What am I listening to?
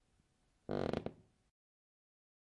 Creaking Floor High Tone
This sound is of a floor creaking in a high tone.
Old, Haunted, Wooden, Creaking, Floor, High-Tone